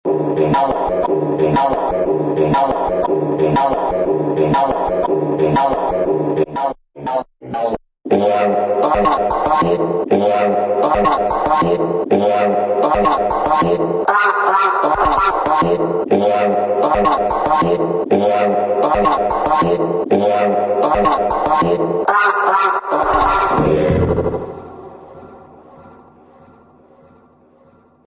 Crowd Moving
A Shaman Type Calling to command people.lol
moving, crowd, voice